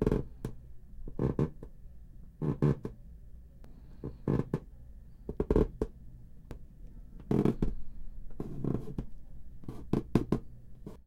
13B Pasos en madera
Steps on a wood floor